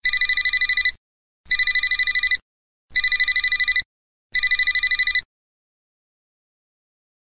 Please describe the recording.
Cell Phone Ring 2 High Tones(1)
phone cell phone ringing ring
cell, ringing